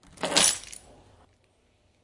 The sound of keys being picked up.
This sound is actually made of multiple recordings of keys being picked up, to imply there are many keys.